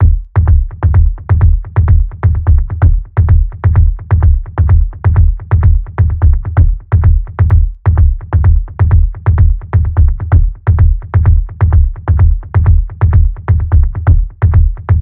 Experimental Kick Loops (19)
A collection of low end bass kick loops perfect for techno,experimental and rhythmic electronic music. Loop audio files.
beat, drum, rhythm, 2BARS, percs